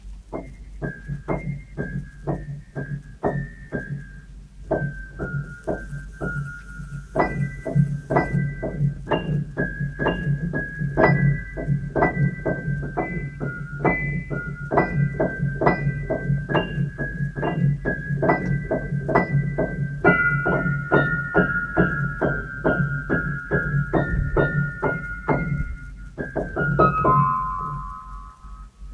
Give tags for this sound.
piano lo-fi cell-phone

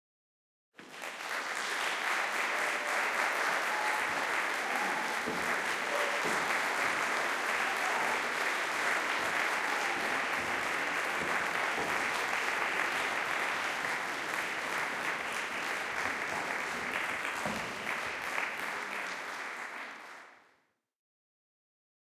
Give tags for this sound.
applauding cheering clapping

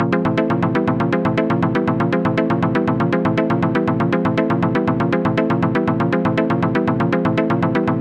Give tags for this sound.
LOOP MIDI SYNTH

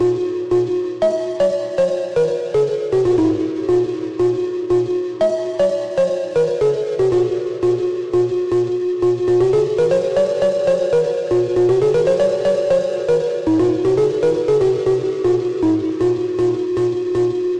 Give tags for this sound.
bass ambiance distortion chords sounds noise effect music Piano pass loopmusic processed project samples game reverbed ambient distorted sound Drums low

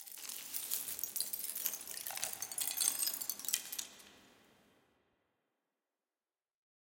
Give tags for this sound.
splinter ice crush crunch drop eggshell crackle

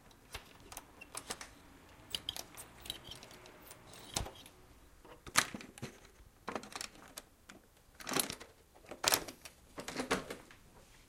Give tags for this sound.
random clatter objects rummage rumble

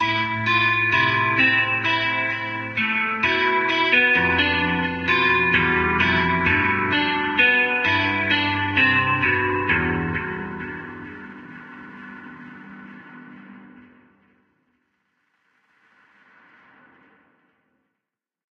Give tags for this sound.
cinematic eletric film Guitar loop minimal Nylon Plucked Sad Solo Strings

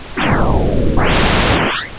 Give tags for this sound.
Logo Picture Image Twitter

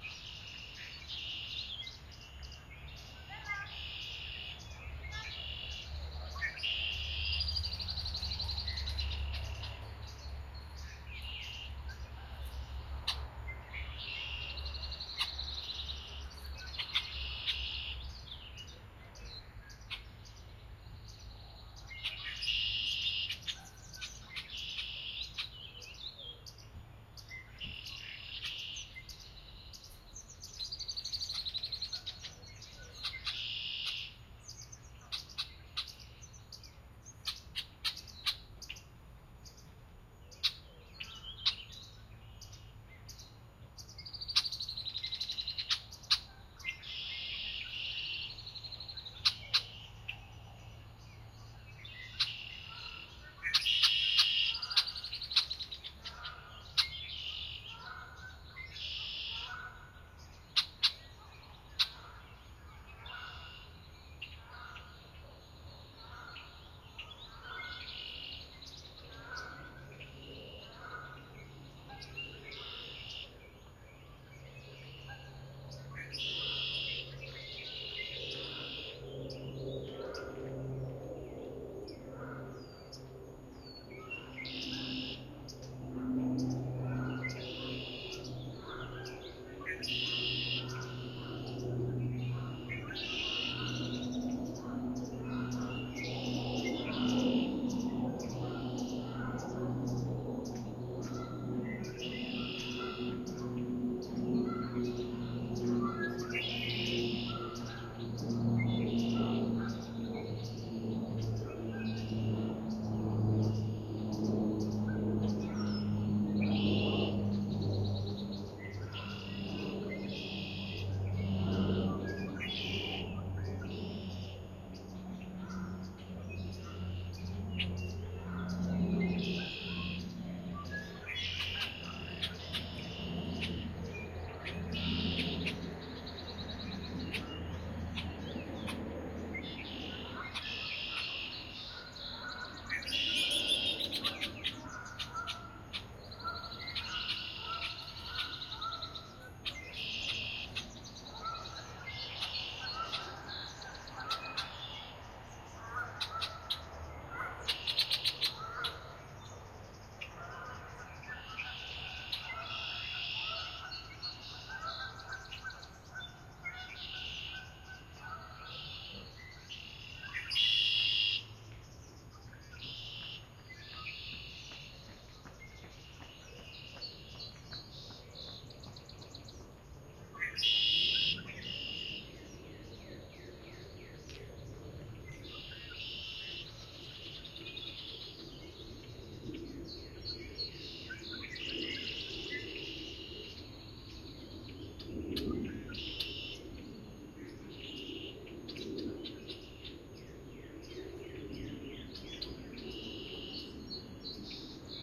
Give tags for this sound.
marsh canada geese field-recording chirp binaural birds outside nature tweet blackbird

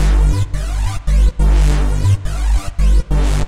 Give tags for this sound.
flange bassline

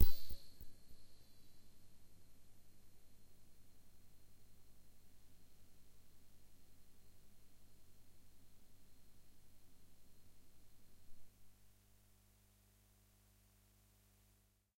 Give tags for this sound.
synth electronic multi-sample waldorf bell pad bellpad

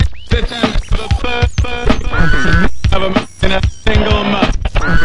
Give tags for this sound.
radio weird

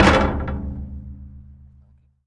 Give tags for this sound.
metal iron hitting percussive jump

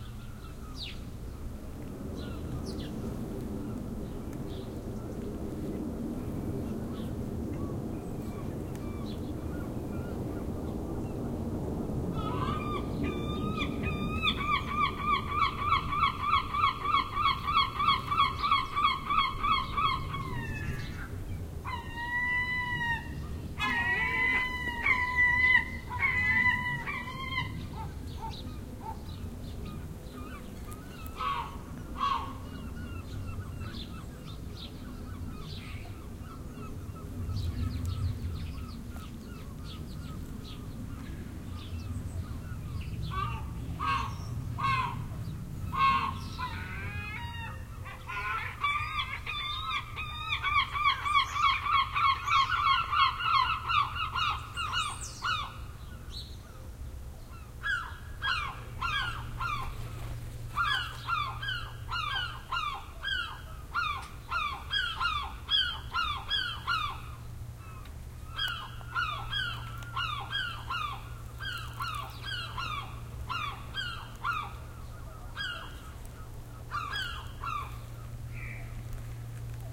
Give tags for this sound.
birds field-recording nature seagulls

